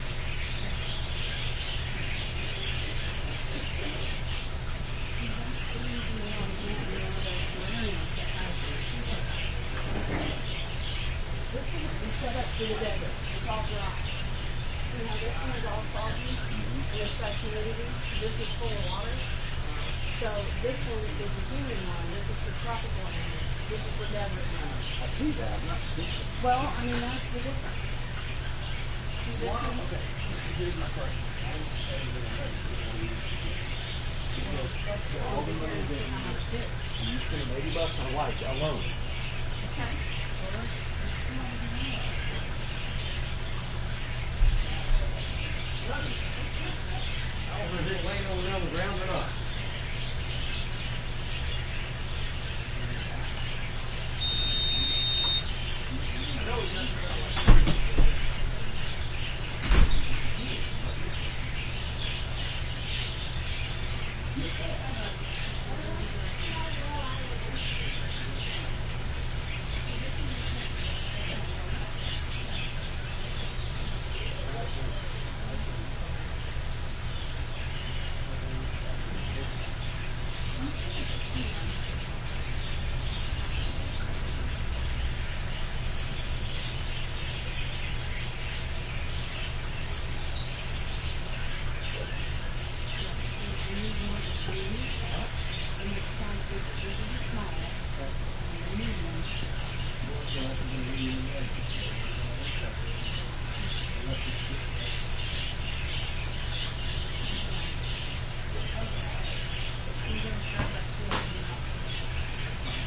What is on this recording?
Pet Shop 2, Birds
Birds are kept behind a pane of glass directly in front of me. To my left, a couple discuss terrariums. Water runs nearby, and a door opens.
ambience, animals, birds, fish, pet, room, shop, tone, vents, walla, water